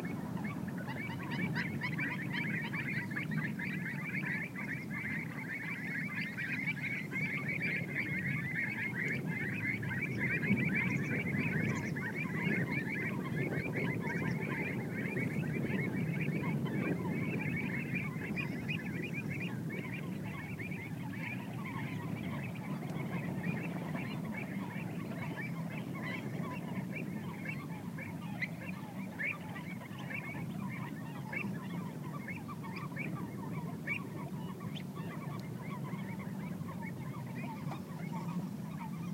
a large group of avocets sing as they fly over a marsh, some black-winged stilts in background. Recorded at Doñana, south Spain / un grupo de avocetas cantando con algunas cigüeñuelas el fondo
avocets, birds, field-recording, marshes, nature